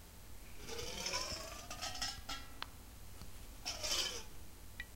stapler spring
noise, spring, stapler